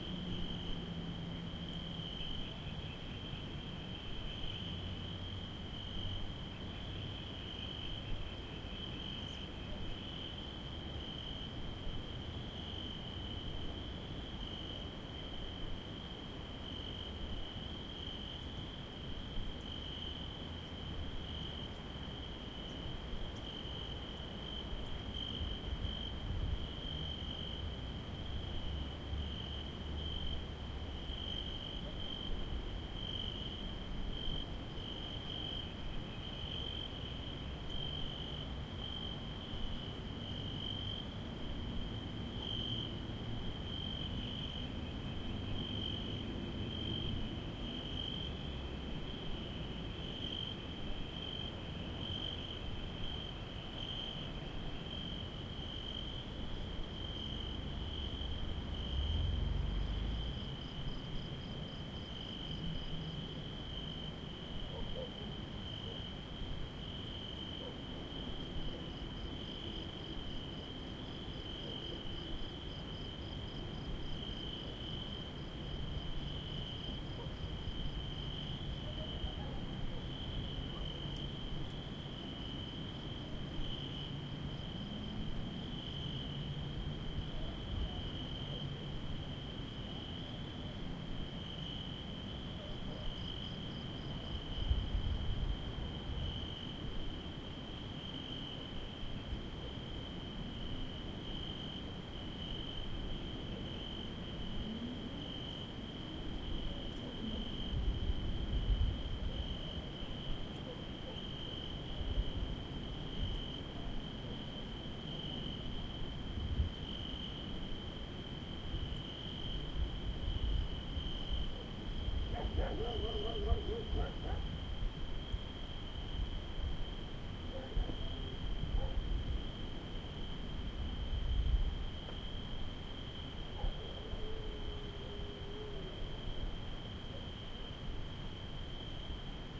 Gentle rich cricket and insects at night rural-Lebanon
Soft and gentle ambience of a cricket and other insects in a summer night in a rural setting. zoom H4N mixed multitrack with a mono shotgun
insects, alive, pleasant, grasshopper, rural, cricket, soft, ambience, spring, nature